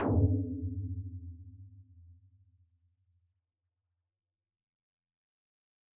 222065 Tom RoomHighReso 07
One of several versionos of a tom drum created using a portion of this sound
which was processed in Reason: EQ, filter and then a room reverb with a small size and very high duration to simulate a tom drum resonating after being struck.
I left the sounds very long, so that people can trim them to taste - it is easier to make them shorter than it would be to make them longer.
All the sounds in this pack with a name containing "Tom_RoomHighReso" were created in the same way, just with different settings.
dare-26, drum, tom-drum, processed, Reason, image-to-sound, tom